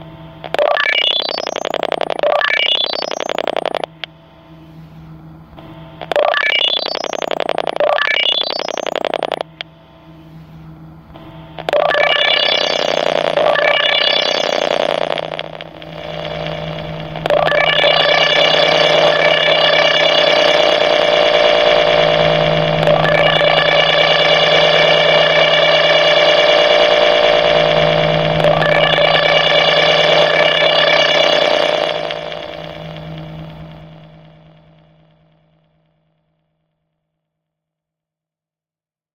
Using an analog "buttset" or test-set telephone, clipping the leads from an EXFOMAXTESTER device and hearing the tones from testing a line, at a terminal/crossbox location (roadside).
Cut some low frequencies and added 2s, 5s, then 3s digital-delay effects automated and a reverb effect.